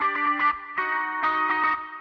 Rhythmic loop with my guitar. Logic
120-bpm, distorsion, guitar, loop, processed